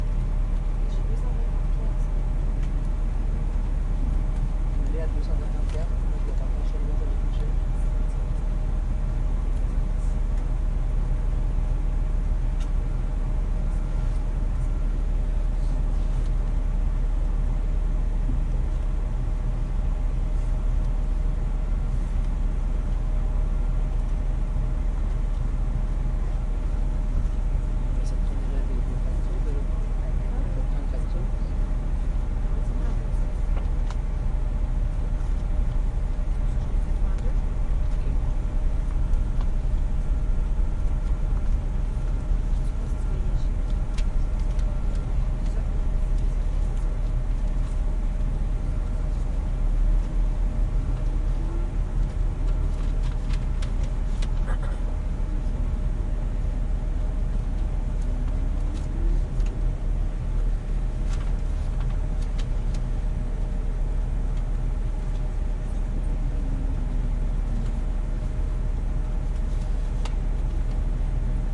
bus coach int idle weird stereo in seat +people movement2
bus, coach, idle, int